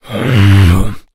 A low pitched guttural voice sound to be used in horror games, and of course zombie shooters. Useful for a making the army of the undead really scary.
Zombie, Evil, Talk, indiedev, Ghoul, Speak, Voice, videogame, gamedeveloping, games, game, arcade, Undead, Voices, indiegamedev, Vocal, gamedev, videogames, horror, Lich, gaming, Monster, sfx, Growl